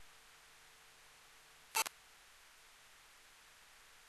Electromagnetic field recording of a TV remote control using a homemade Elektrosluch and a Yulass portable audio recorder.
Can be used as hit/walk sound on some kind of 8bit game.